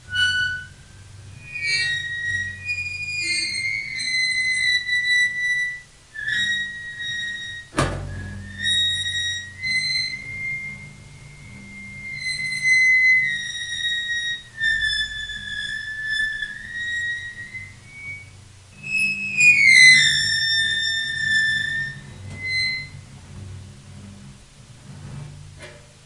Metal Stretch One
Metal, scrape, stretch